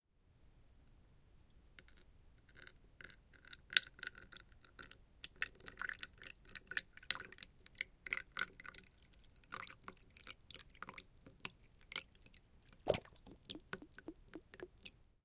water dribble
Water dribbling into a cup.
Olympus LS-5, built-in mic capsules, no filtering.
dribble, drop, plip, plop